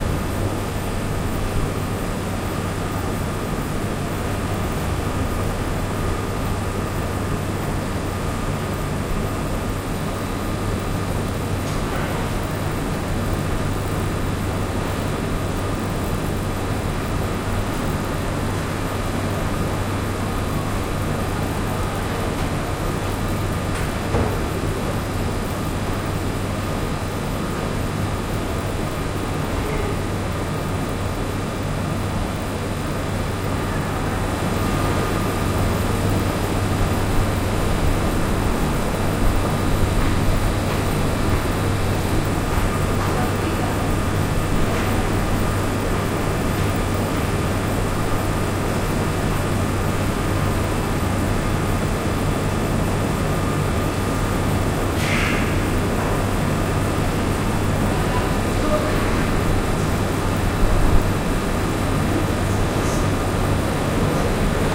Underground Air Conditioner Unit
Zoom h6 recording of a particularly noisy subway air conditioning unit.
mechanical, fan